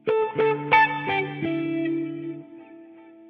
Short "octave" guitar sample made with my Epiphone Les Paul guitar through a Marshall amp and a cry baby wah pedal. Some reverb added. Part of my Solo guitar cuts pack.